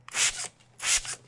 Spraying a cleaner spray with a trigger like glass cleaner